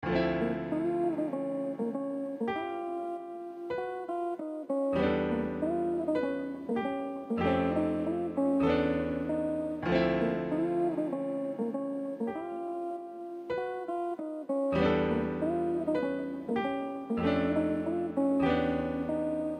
Genesis | Piano Chord Loop | Key: A Minor | BPM: 98
Filler, Studio, Piano, Short, Hip-Hop, Loop, Music, Vlog, Instrumental, FL, Chords, Tik-Tok, Background, Soundscape, RnB, Jazz, Background-Music